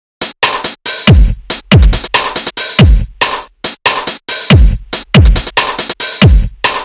Loop A01a - Drums
A drum loop at 70 bpm with kick, snare, and three hats. Kick and snare made in FL Studio 11, sequenced in FL Studio 11.